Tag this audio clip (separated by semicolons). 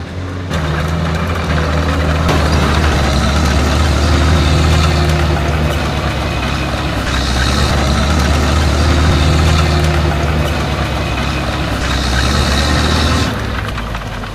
army; engine; machine; military; motor; tank; war; ww2